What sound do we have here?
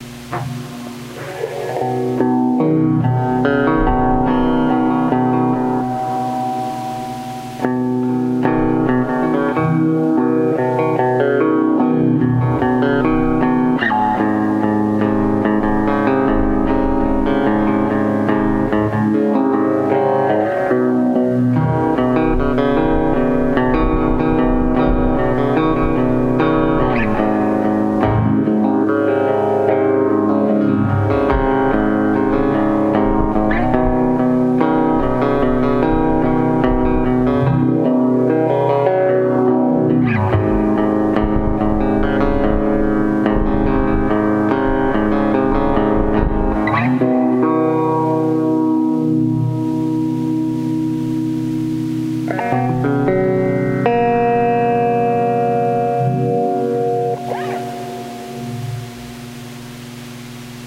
GaragePhaseShifter Guitar1
My fender telecaster going through a home-made 8-stage phase shifter. Very messy circuit, but i like the dirty noisy sound.
analog, dirty, phaser